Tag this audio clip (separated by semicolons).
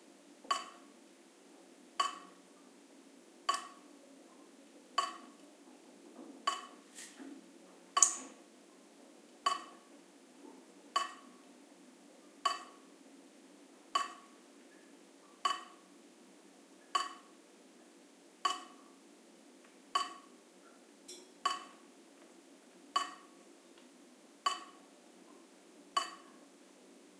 faucet; dampness; leak; tap; dripping; rain; water